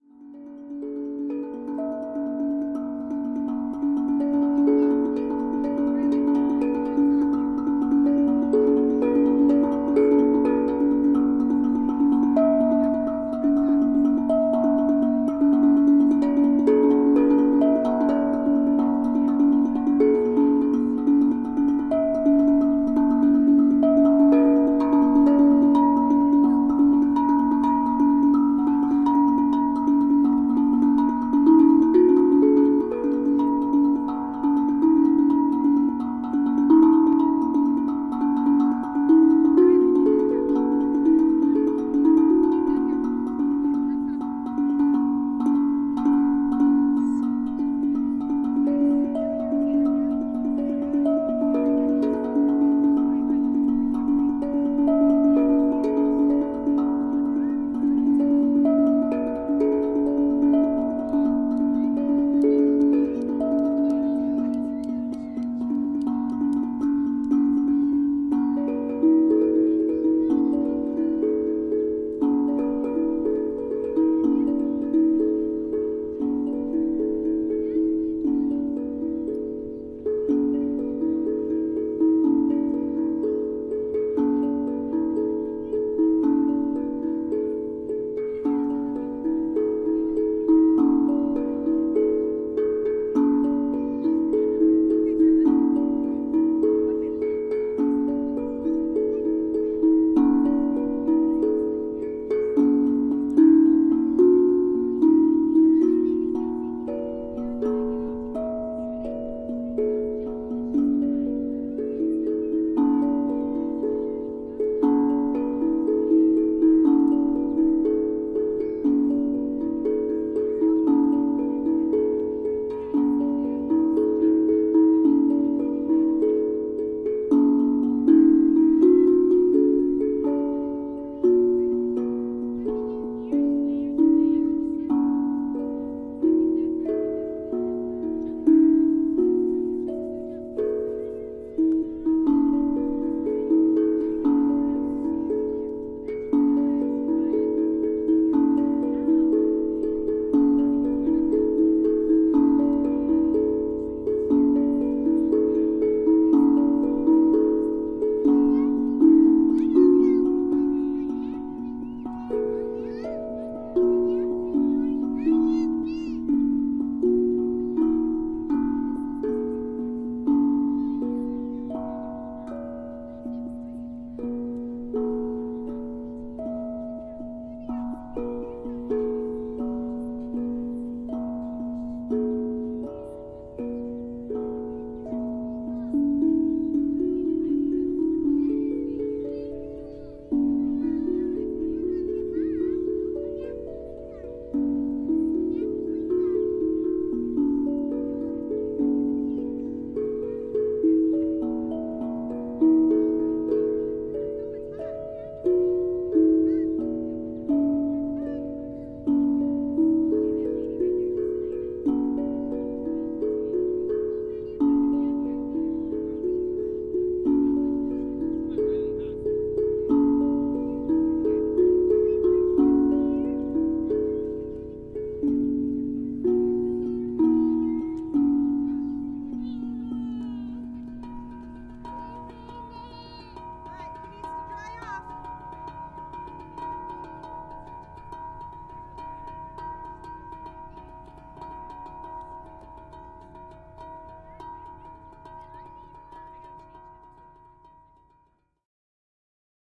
Steel Tongue Drum played by Cicada near Lake Michigan
It makes some lovely sounds.
beach, steel, xylophone-like, drum